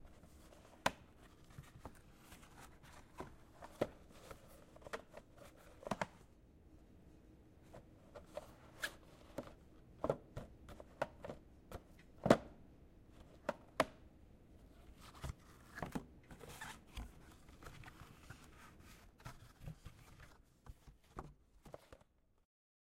Recorded using a Zoom H6. Sound made by putting together a box container.